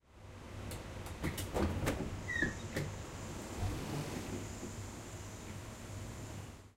The sound of an electronic door opening with the beeping removed on a typical EMU train. Recorded with the Zoom H6 XY Module.